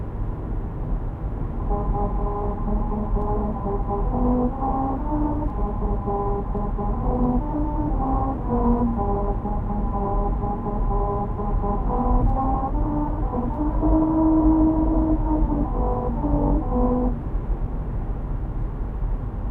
The musical road outside Lancaster, California. recorded in main body of car.